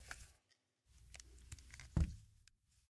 A gun being placed on a table.
foley, gun, revolver